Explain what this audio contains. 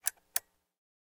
Marantz PDM201 PAUSE (unpowered)
Sounds from my trusty Marantz PMD201 cassette recorder.
It wasn't powered at the time, these are just the sounds of the button action.
AKG condenser microphone M-Audio Delta AP
click, button, tape